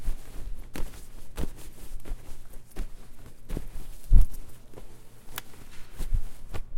Jacket Rustle Aggressive 2
cloth, fabric, rustle, rubbing, clothes